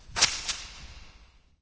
Artificial Simulated Space Sound 12
Artificial Simulated Space Sound
Created with Audacity by processing natural ambient sound recordings
alien, ambient, artificial, atmosphere, drone, effect, experimental, fx, pad, sci-fi, scifi, soundscape, space, spacecraft, spaceship, ufo